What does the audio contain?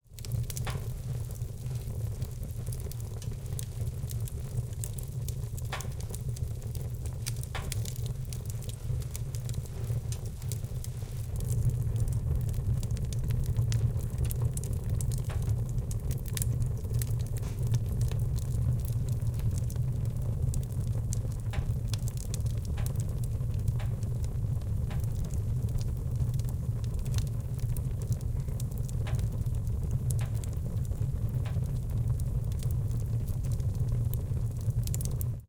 foley,fireplace,burning,crackling,wood,flame,expanding,heated,metal,tension 02 M10
Sound of a heated metal fireplace making expansion torsion sounds. Subtle metal hit sound.
fireplace, element, design, pressure, torsion, expanding, friction, field-recoridng, foley